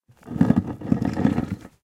Rock Scrape 4
A stereo field recording of a granite rock being slid along granite bedrock. Rode NT-4 > FEL battery pre-amp > Zoom H2 line-in.
bedrock, field-recording, grind, rock, scrape, stereo, stone, xy